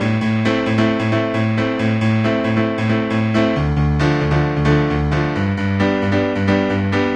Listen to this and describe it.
A Minor Dance Piano
Just a piano loop. Last and first few samples have been faded to eliminate pops on looping.
A-Minor, Dance, Dry, Piano, Upbeat